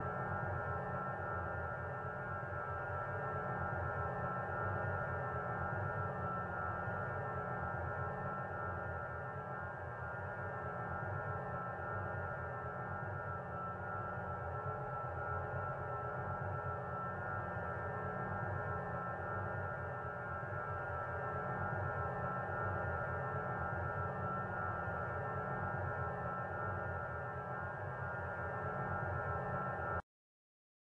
piano high resonance loop
Piano high resonance filtered and elaborated as a loop. Used dynamics envelopes from water flowing soundfile on Max/MSP for irregular texture.
loop, piano, soundscape